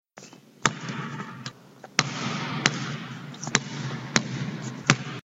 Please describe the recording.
Gym Sounds 01
Playing Basketball in the gym.
Ball, Basketball, Dribbling, Foley, Gym, sound